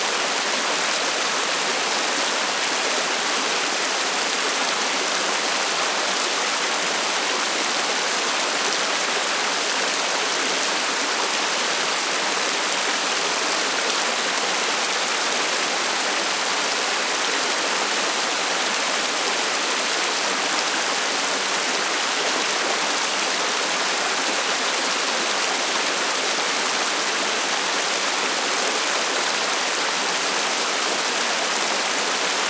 water overflowing an artificial pond near Dehesa de Abajo, Sevilla (Spain), clashing on concrete. sennheiser me66+AKG CK94-shure fp24-iRiver H120, decoded to mid-side stereo
clashing, overflow, water, autumn, nature